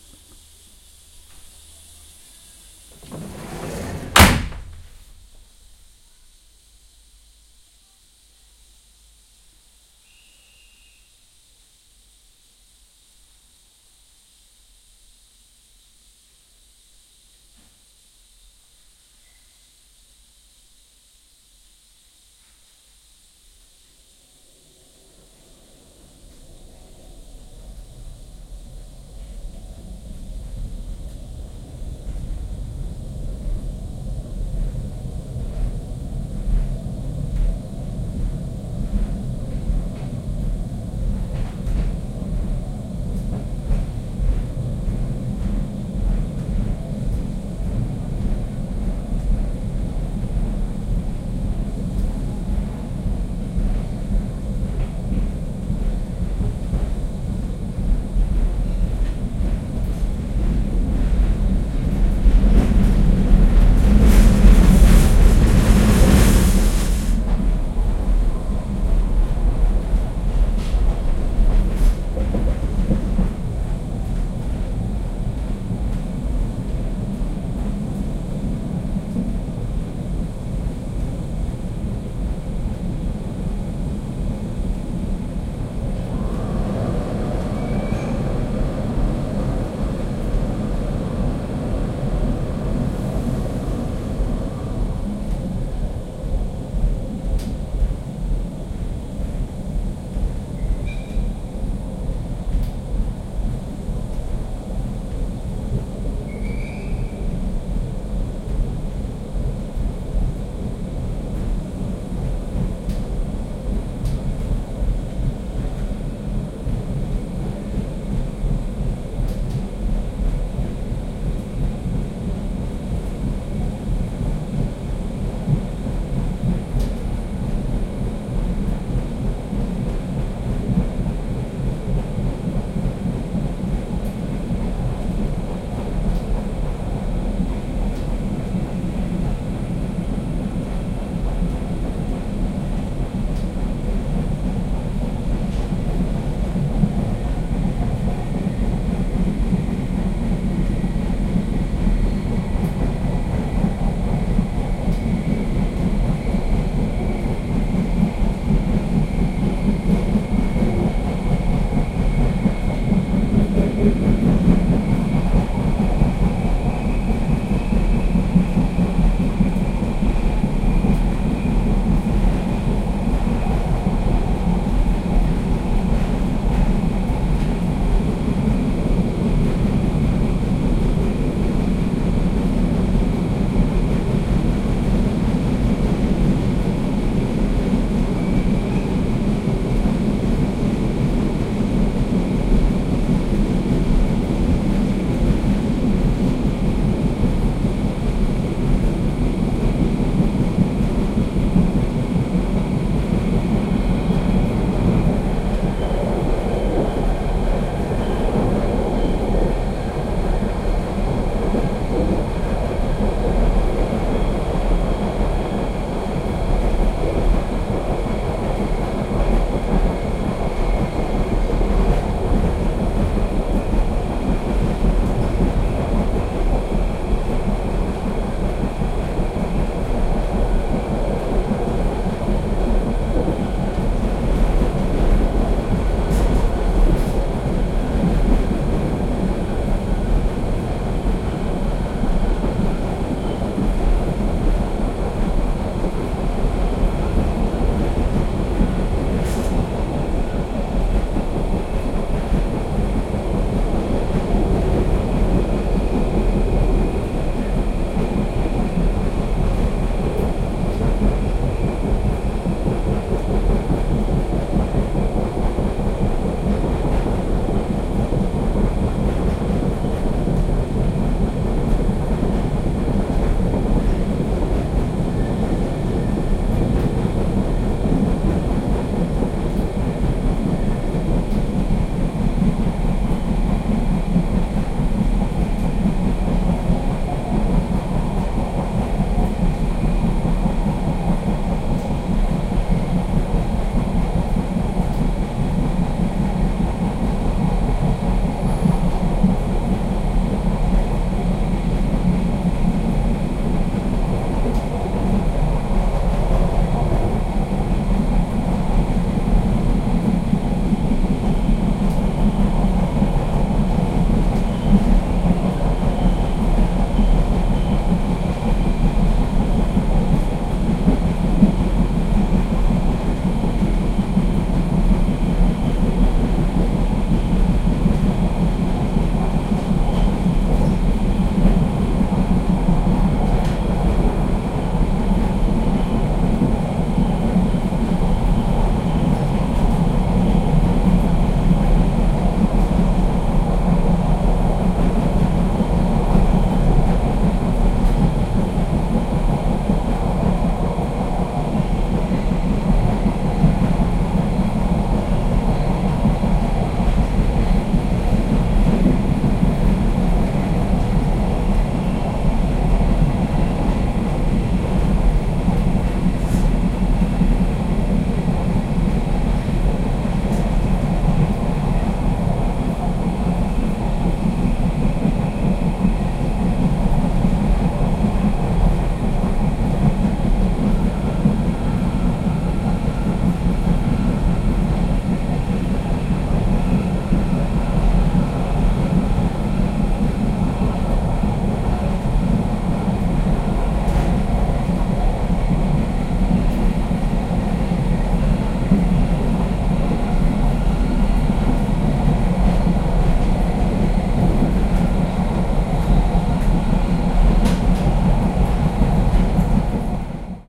Inside old train atmo
Riding an old train. Starting with closing the compartment door at the station, then slowly accelerating. Recorded with Zoom H4N.
ambiance, ambience, atmo, atmos, atmosphere, background, background-sound, field-recording, locomotive, passenger-train, railroad, steam, train, train-ride